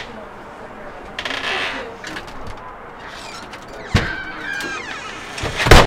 creaking door
Sound of a screen door closing.